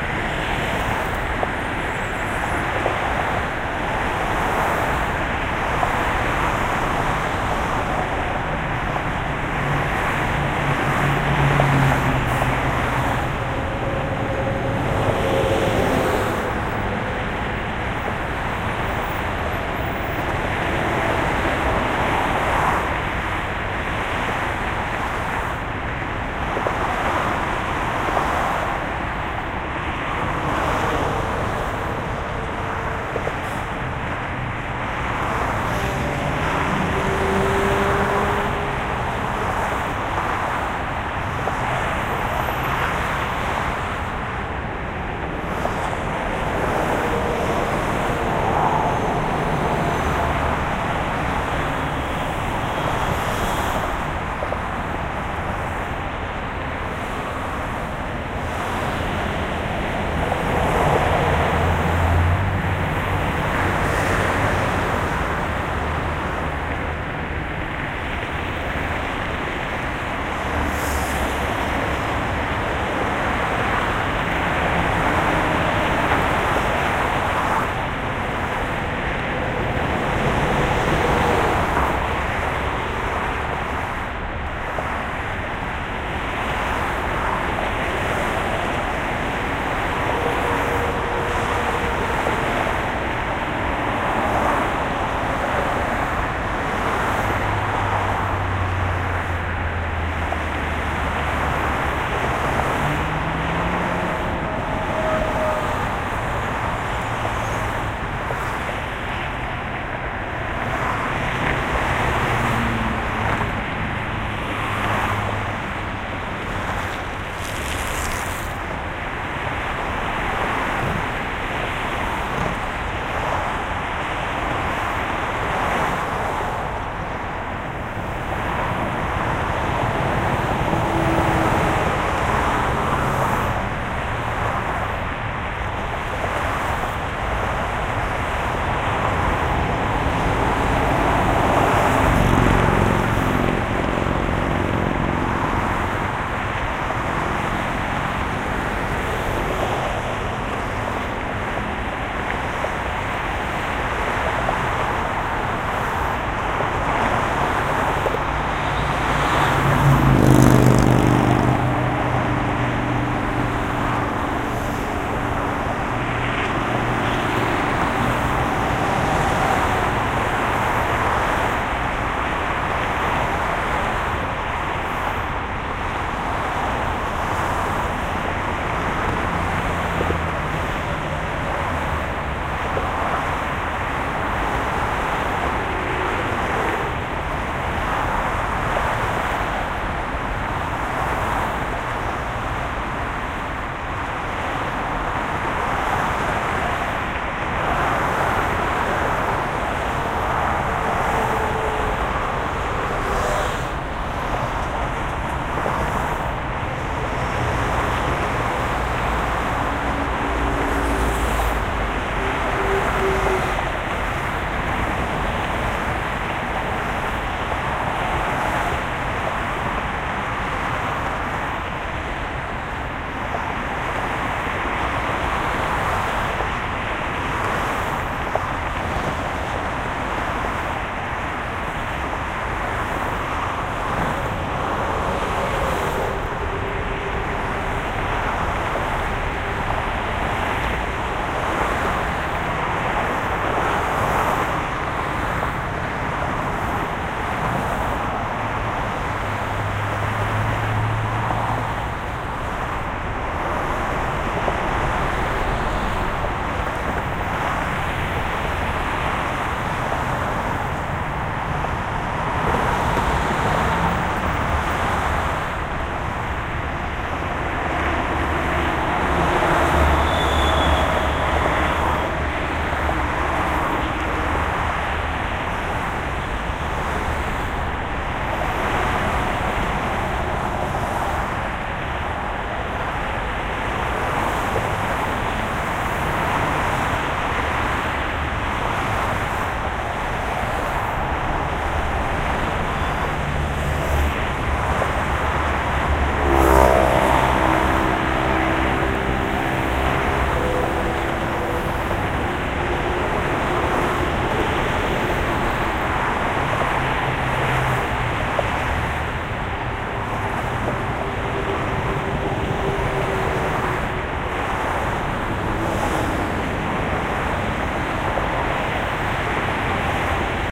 Hwy 134 in Burbank (Binaural)
(RECORDER: ZoomH4nPro 2018)
(MICROPHONES: Binaural Roland CS-10EM In-Ear Monitors)
As these are recorded using binaural in-ear mics, I purposefully don't turn my head to keep the sound clean and coming from the same direction.
This is traffic on Hwy 134 (next to Walt Disney Studios / Warner Brothers Studios) recorded while standing on the Hollywood Way overpass on 11/16/2018 in Burbank, CA. Surprisingly, traffic flow was pretty good and I would assume cars were traveling around 40 to 50 MPH.
Enjoy,
motorcycles, sound-effects, ambience, city, trucks, binaural, motorway, cars, road, background, driving, highway, street, ambient, traffic, field-recording, soundscape, freeway